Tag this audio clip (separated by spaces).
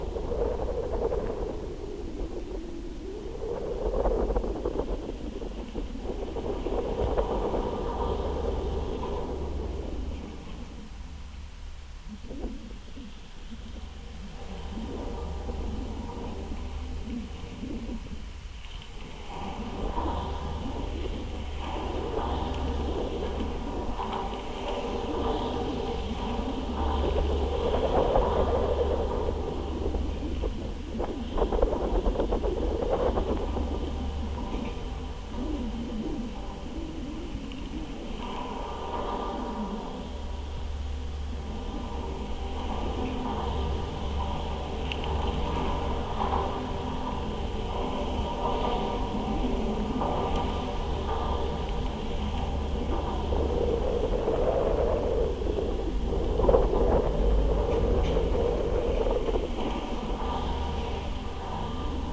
Fishman Golden-Gate-Bridge V100 bridge cable contact contact-microphone field-recording piezo sample sony-pcm-d50 wikiGong